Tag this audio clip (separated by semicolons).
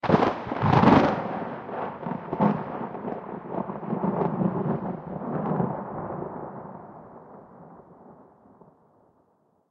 Heavy; Horror; Loopable; Scary; Sound; Thriller; Thunder; ThunderSound